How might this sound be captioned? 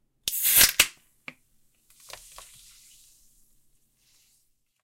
Drinks can opening 1
Opening a can of fizzy drink (a can of beer), which slightly fizzes over. Recorded on an H5
lager, beer, soda, drinking, can, coca-cola, beverage, ale, cola, drink, water, liquid, canned, fizzy, coke